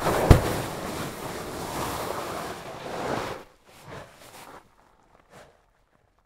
gravel sliding around 7
Foley SFX produced by my me and the other members of my foley class for the jungle car chase segment of the fourth Indiana Jones film.
gravel, sliding